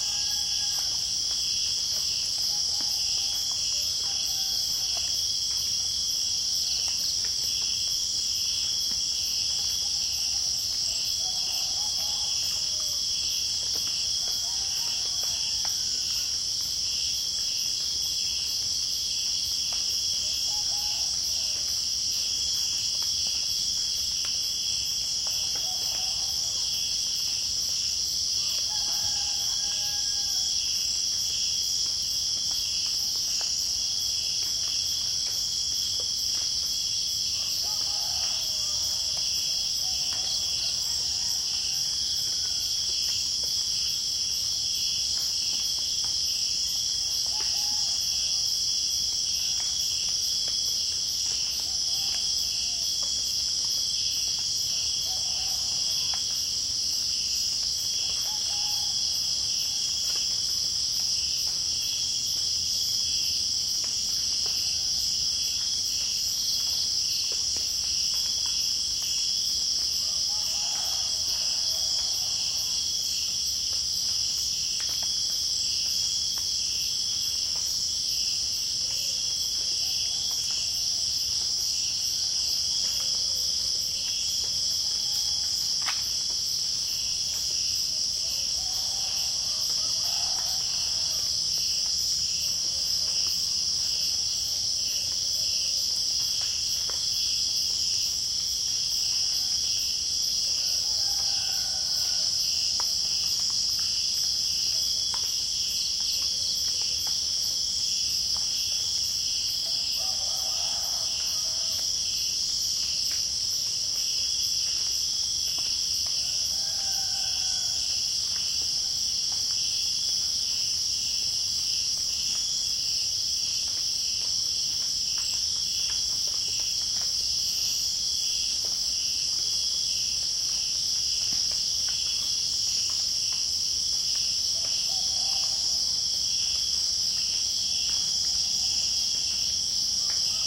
140930 night jungle nature ambience.Chiangmai Thailand. Cicades. Dew Drops. Roosters (ORTF.SD664+CS3e)
cicadas, nature, rooster